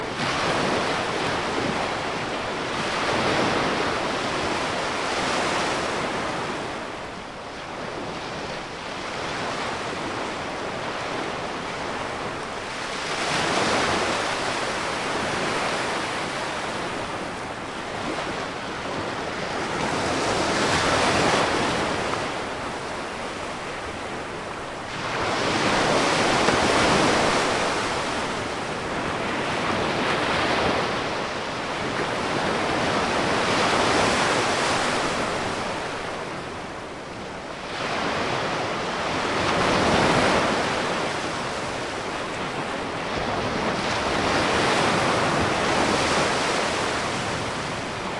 Gentle waves coming ashore on a deserted tropical sandy island beach along the Gulf of Mexico on Florida's west coast. Recorded on a H2